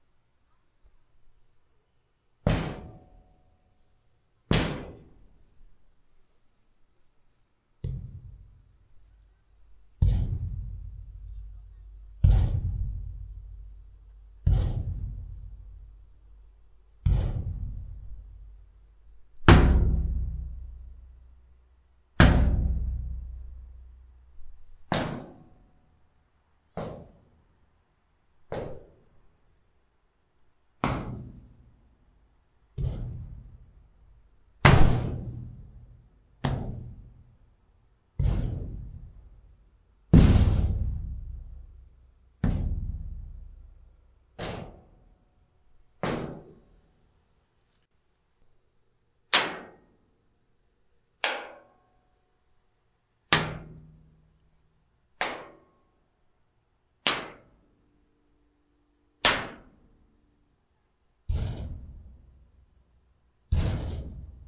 Hitting metal surface with stick
Sound created by hitting metallic surface with wooden stick.
compact, hit, huge, impact, metal, stick, strike, struck, surface